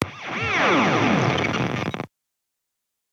Alien Weapon 011 compressed
Another set of sound tails produced by an Amp Sim. Sound a bit like alien weapons
(see pack description for details)
The sounds where subject to granular processing. In some of the sounds yuo can notice the use of the 'grain freeze' function.
The sounds were amplified and compressed in Audacity to bring out the detail of the tail section.
amplifier, amp-modelling, amp-VST, arifact, experimental, noise, Revalver-III